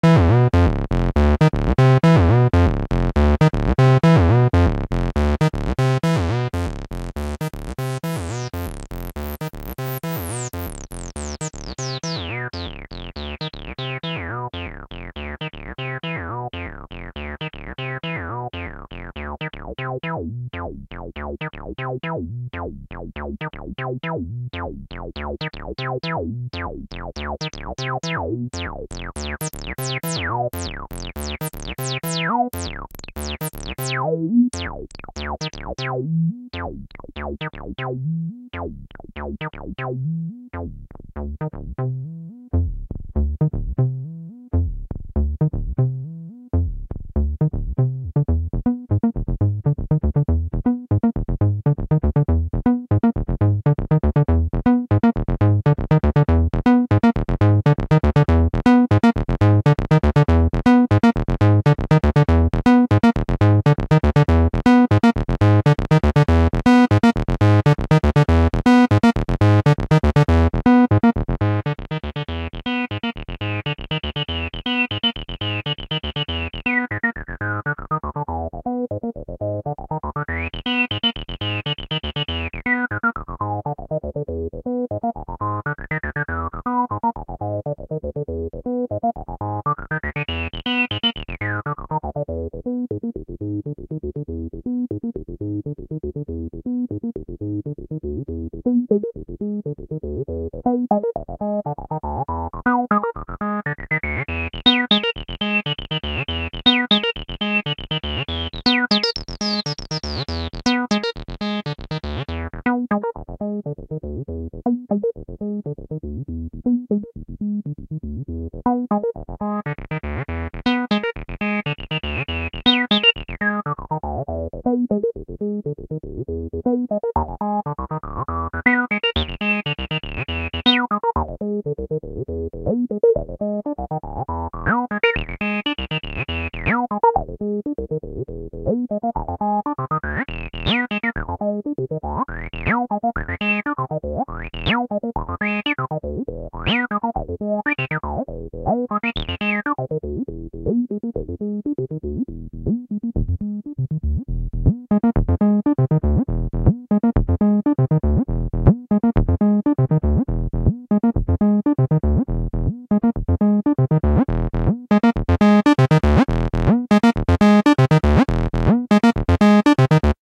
tb-03 120bpm random04
Sampled from my Roland tb-03. Created by using the randomize function. No distortion added.